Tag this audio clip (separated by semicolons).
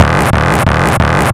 180; distortion; hard; hardcore; kick